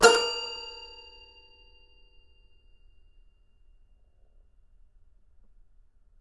Toy records#15-A#3-03
Complete Toy Piano samples. File name gives info: Toy records#02(<-number for filing)-C3(<-place on notes)-01(<-velocity 1-3...sometimes 4).
instrument, samples, piano, toy, sample, toys, toypiano